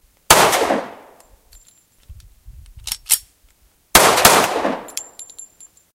This sound was recorded at the gun, and even includes the brass hitting the ground after ejection
223, fire, rifle, impact, brass, field-recording, rounds, report, gun, target